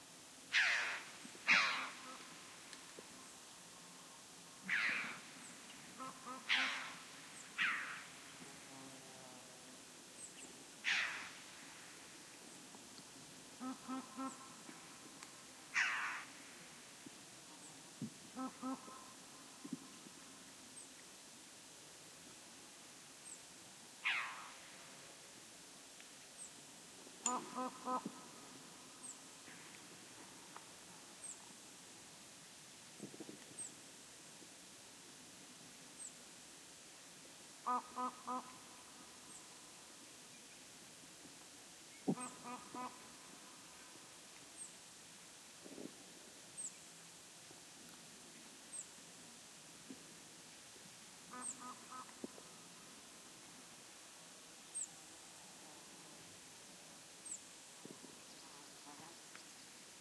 Callings from one Red-billed Chough and one Raven in flight. Recorded at the mountains of Sierra de Grazalema (S Spain) with Primo EM172 capsules inside widscreens, FEL Microphone Amplifier BMA2, PCM-M10 recorder.